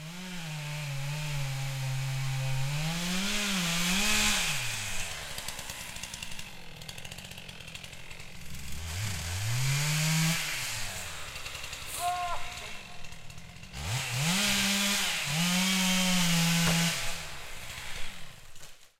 chainsaw felling tree
chainsaw sound from felling trees